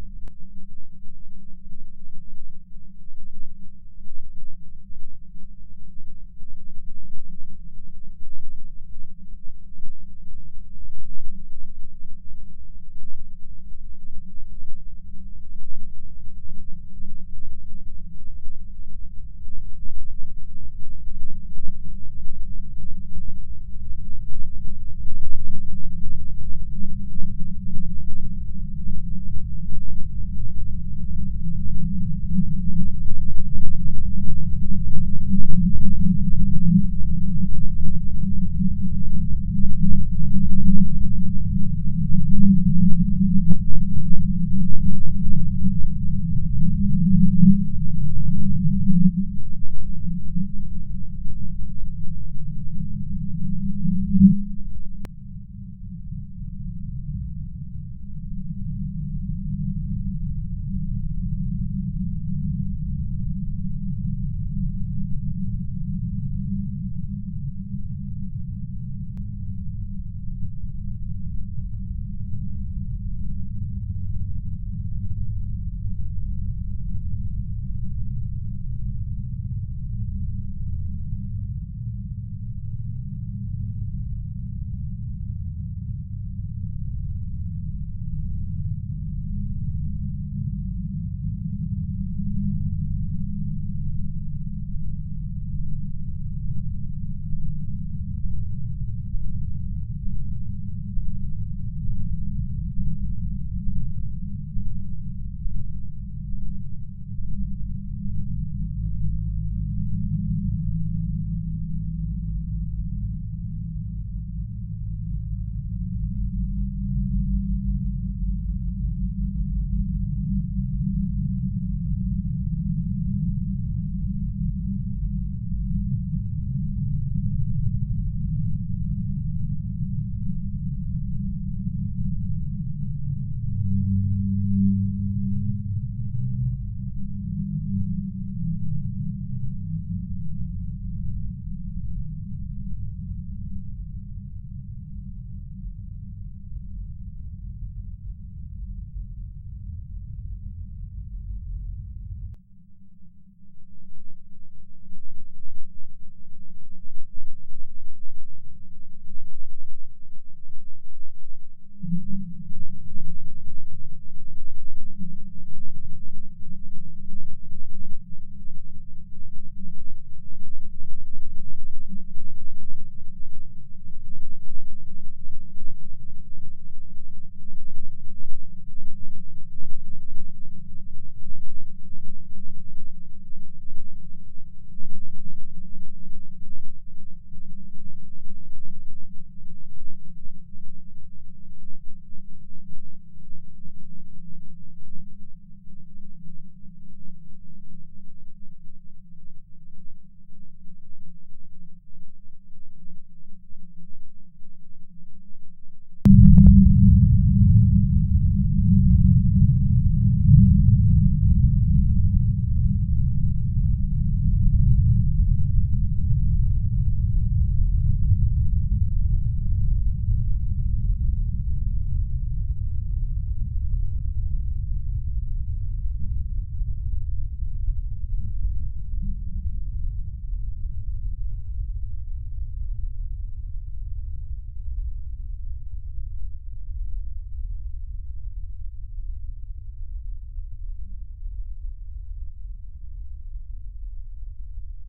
Background Creepy Sounds
Good background sound effects for supense or horro scenes.